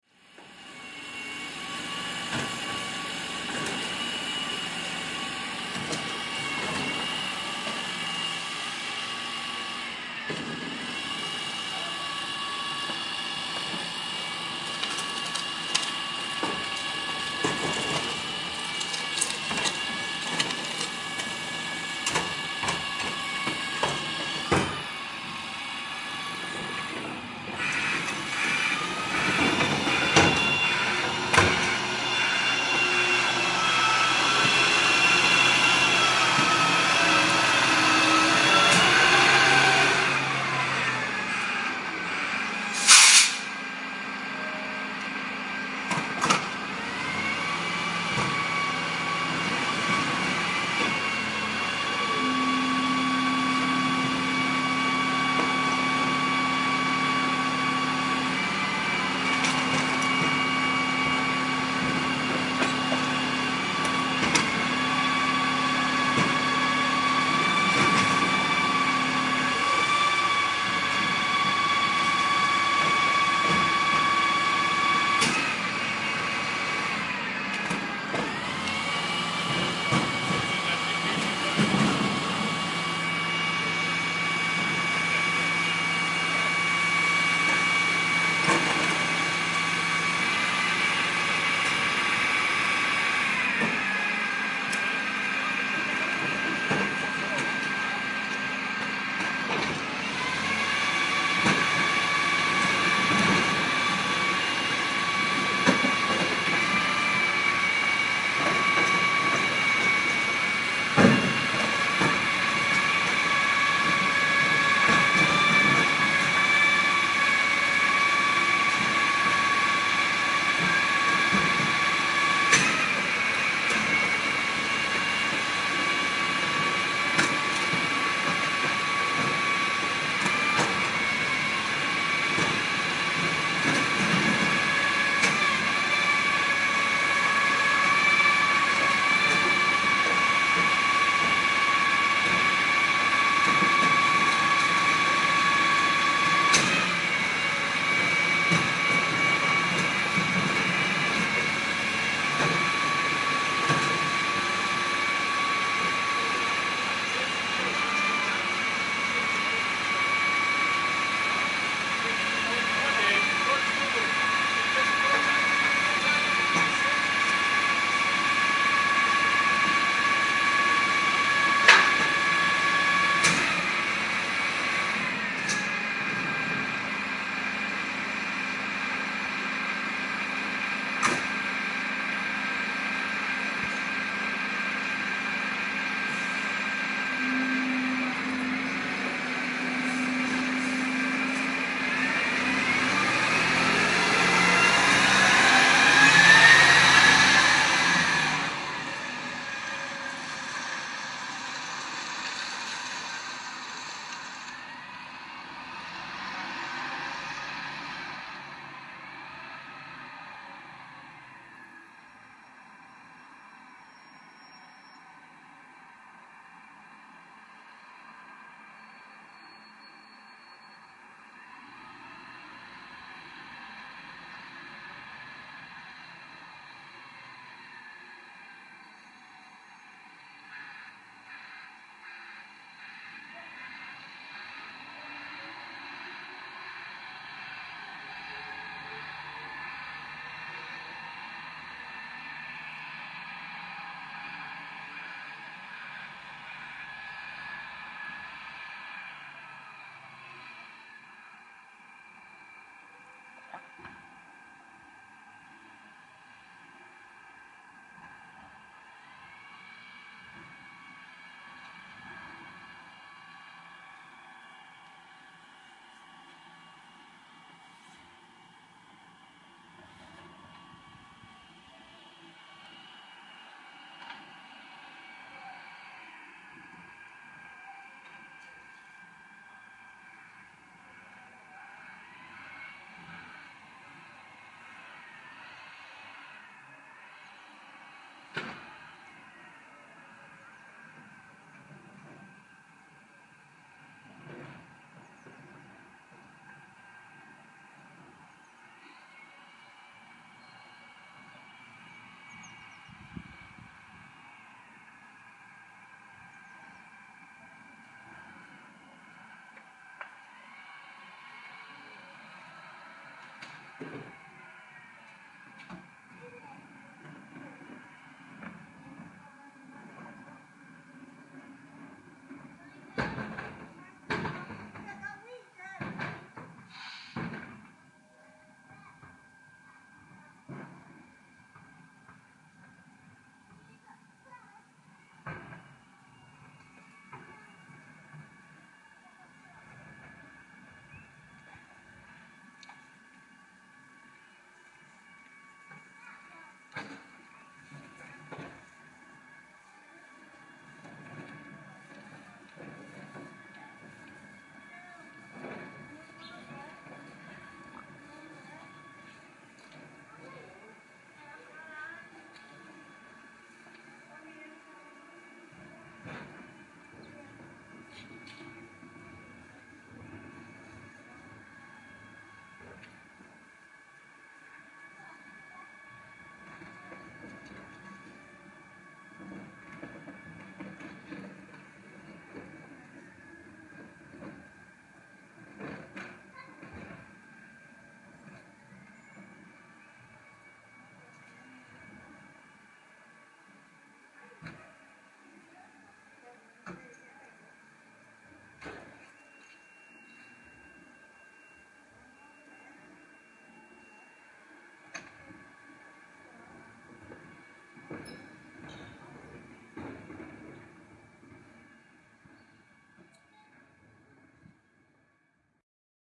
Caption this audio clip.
waste collection 3
Recording of a rubbish collection lorry. You hear the sound of bins being brought out and emptied, as well as the lorry's faulty reversing alarm (this lorry sometimes comes round to a local school, where kids call it the 'cheesegrater' due to the bizarre sound). Recorded with a 5th-gen iPod touch. Edited with Audacity.
air, air-brakes, alarm, beep, bin, brakes, cheese, cheesegrater, collection, garbage, grater, lorry, mechanical, mechanism, recycling, reverse, rubbish, rubish, trash, truck, vehicle, waste